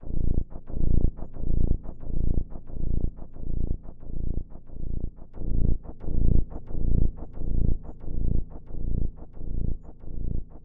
the cube sinte siniestro
They have been created with diverse software on Windows and Linux (drumboxes, synths and samplers) and processed with some FX.
bass, bassdrum, fx, loops, pack, percussion, remix, sample-pack, synths, the-cube